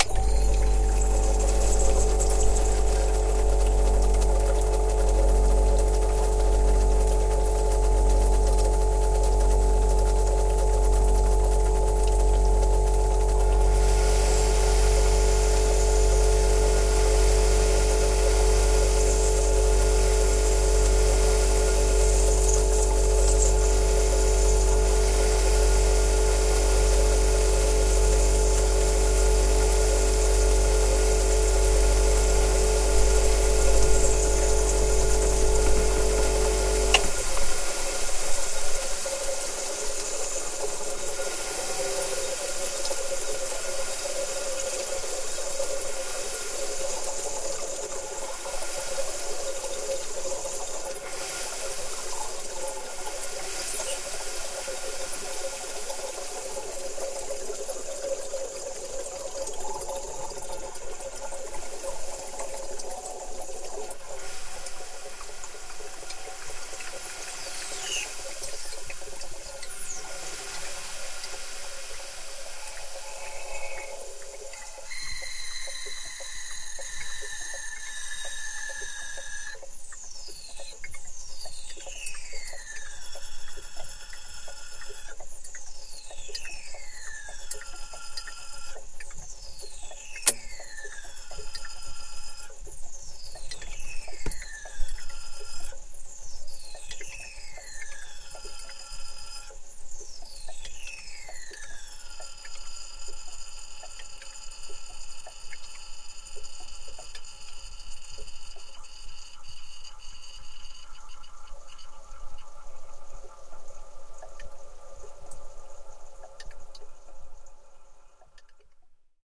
Appliances - Fridge - Portable
Portable fridge hum, turn off, hiss.
appliance, kitchen, cooler, hiss, fridge, refrigerator, hum